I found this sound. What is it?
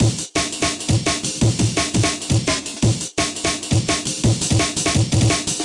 break beats loops 170
170, beats, break, loops